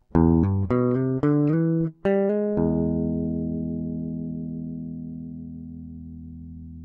guitar melody 9
Improvised samples from home session..
groovie, pattern, fusion, licks, lines, acid, apstract, guitar, funk, jazzy, jazz